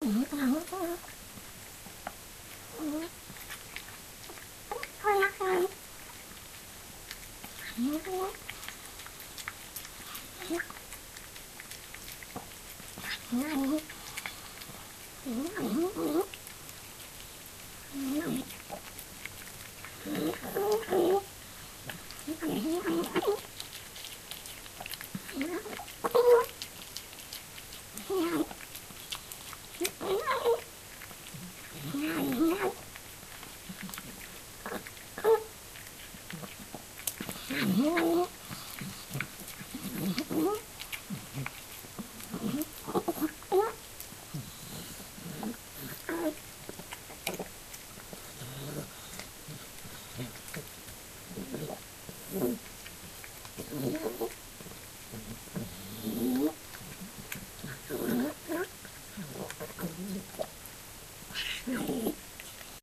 old cat eating
Old cat is eating her dinner.
Recorded with a Nikon CoolPix S6200.
sound, cat, chew, eating, pets, licking, pet, animal, eat, kitty, lick, old, chewing